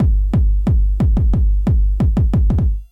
kick loop 180 bpm hard clean
180, bpm, clean, hard, kick, loop